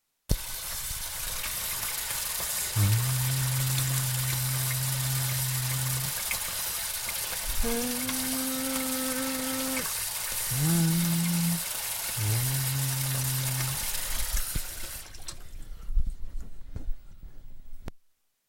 Trying to sing C while the water flows from the kitchen tap